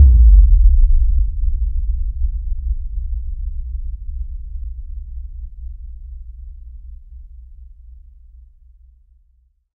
A synthesized VLF boom such as those used in some dance music productions. Created in Cool Edit Pro.